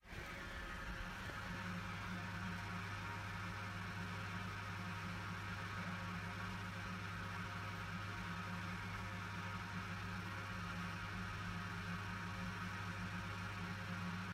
Foley, Street, Ventilation, Hum 03
Vent, Foley, Background, Recording, Hum, Ambience